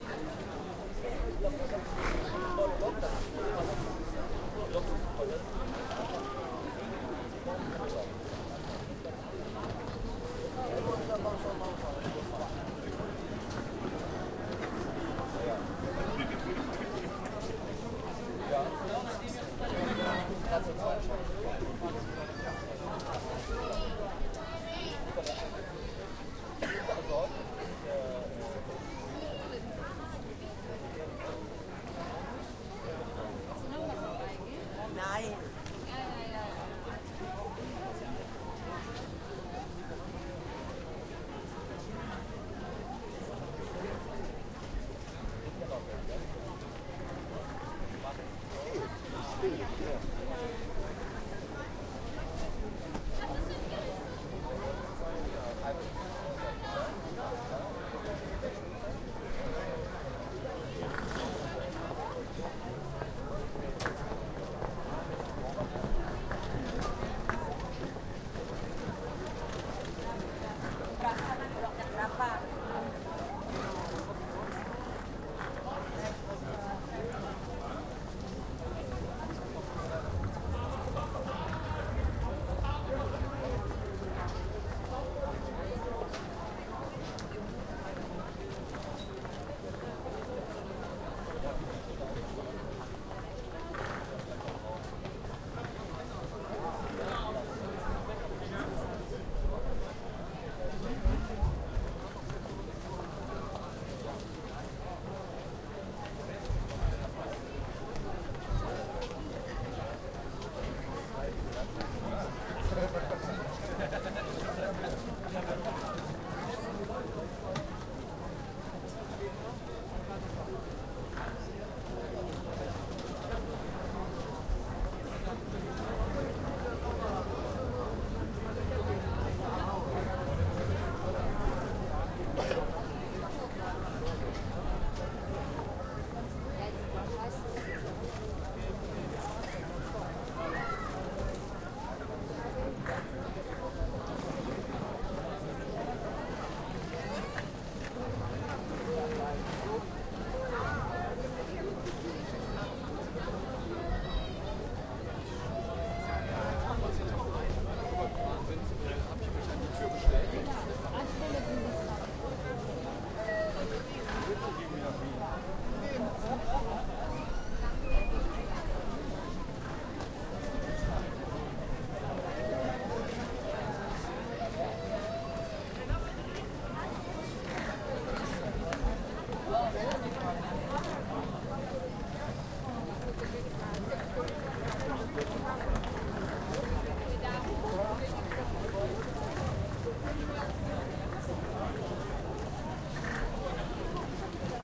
Duesseldorf main station, Germany / Düsseldorf Hauptbahnhof atmo
Inside Duesseldorf main station. People passing by, talking.
Recorded with a Zoom H1.
Im Innenbereich am Düsseldorf Hauptbahnhof. Menschen laufen und reden.
general-noise, Duesseldorf, field-recording, station, ambient, main, atmo, Hauptbahnhof, ambience, people, ambiance